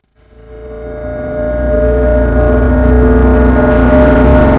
aura metal
I hit my metal space-heater and recorded its resonance, then I reverbed it until it became this weird sound that I don't know how to describe besides it being an aura.